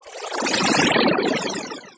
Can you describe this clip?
Created with coagula from original and manipulated bmp files. Insectoid sounds from deep space.